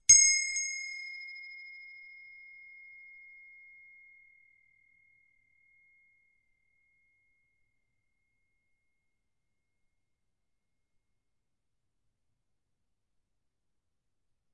brass bell 01 take2
This is the recording of a small brass bell.
bell, brass, ding